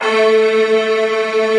Synth Strings through home-made combfilter (32 Reason PEQ-2 two band parametric EQs in series). Samples originally made with Reason & Logic softsynths. 37 samples, in minor 3rds, looped in Redmatica Keymap's Penrose loop algorithm, and squeezed into 16 mb!
Combfilter, Multisample, Strings, Synth